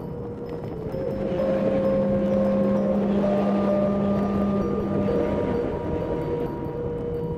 absynth loop2
Ambiance recorded in Brighton blended with chimes and guitar tone.
120-bpm, chimes, f-minor, loop, noise